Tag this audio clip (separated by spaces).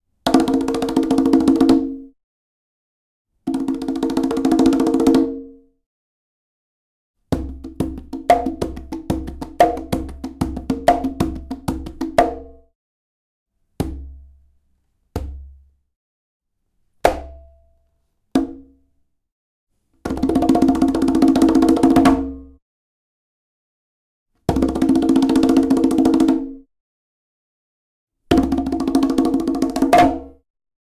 conga drum latin percussion roll